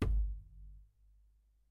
Wooden Junk Kick (deep)
Big wooden box. Used as a kick drum.
basskick, baskagge, kagge, kick, drum, junk, tom